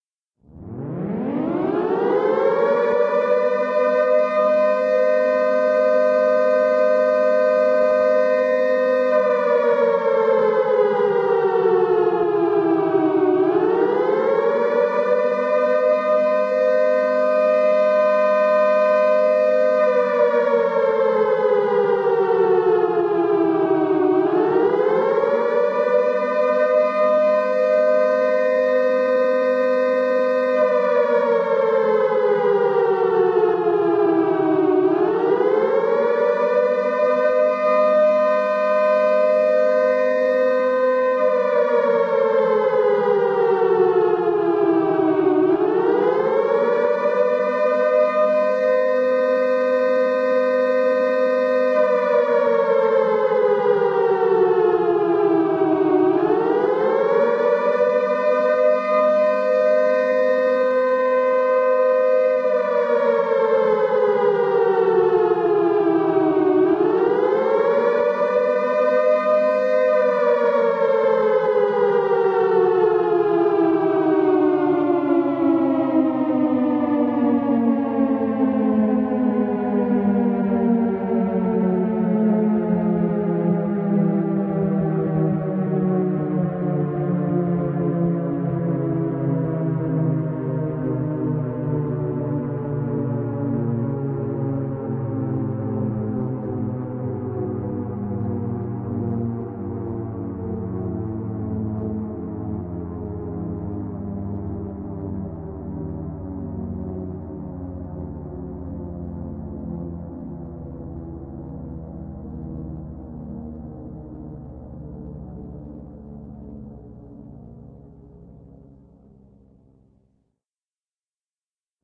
Siren Is Low
This is A Siren